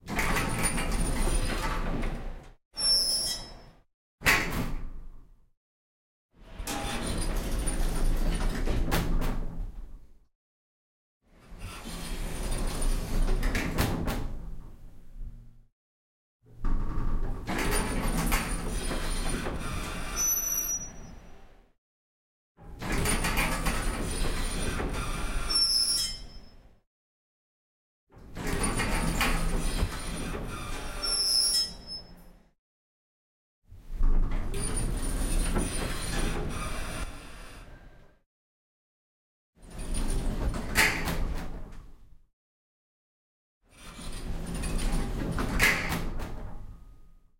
Elevator Doors 1
Despite how new the elevators are, they still squeak and creak whenever they open or close. No mechanical elevator doors are perfect. However, it does conveniently remind everyone that the elevator does open and close. So that's a good thing!
(Recorded using a Zoom H1 recorder, mixed in Cakewalk by Bandlab)
Clang, Close, Creak, Door, Elevator, Mechanical, Metal, Open, Squeak